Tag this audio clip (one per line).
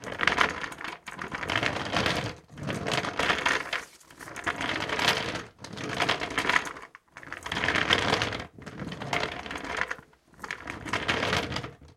moving
roll
rolling
table
upf-cs12
wheel